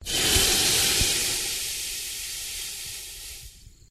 ShovelSizzle8 mod
live recording of placing a hot metal shovel into some water to cool it off.
cool, field-recording, hot, metal, sizzle, water